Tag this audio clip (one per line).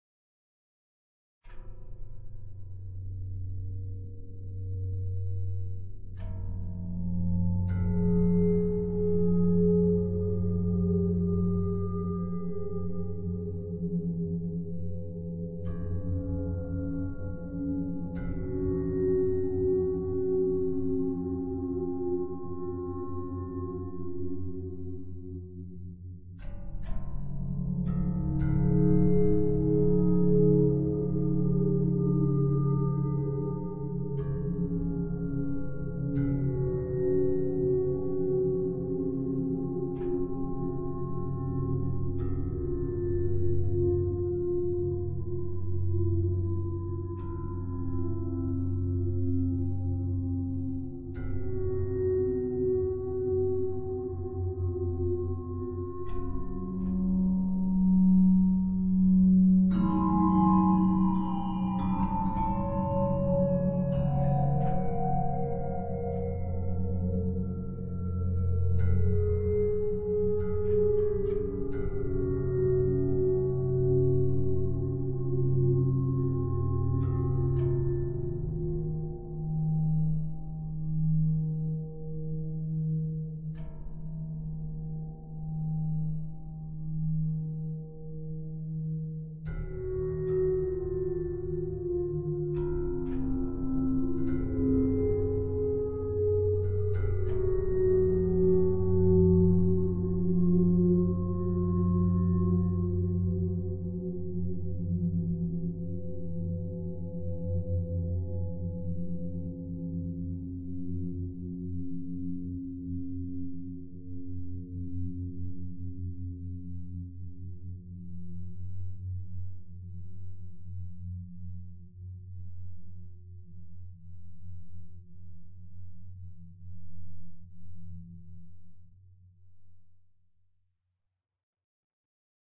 abstract
dreamy
metallic
resonant
soundscape